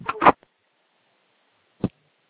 hang up and very long drop